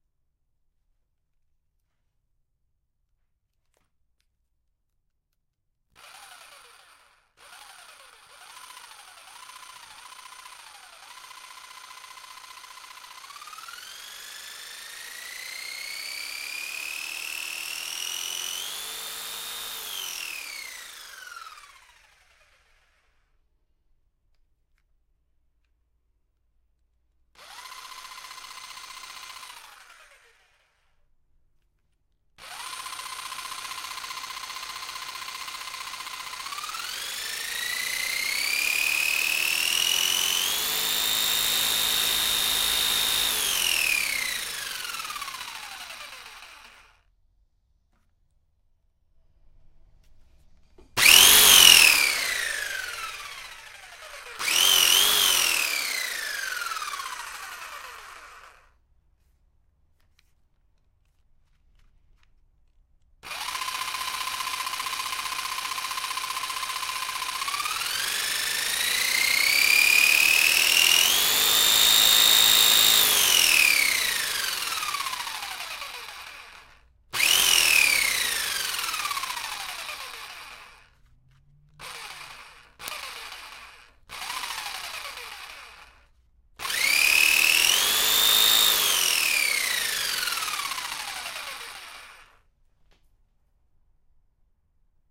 Cheap Black & Decker jigsaw being used in the air (not cutting any material) at various speeds. A good "rev up" sound. Recorded with a Neumann TL103 through a MOTU 828MkII.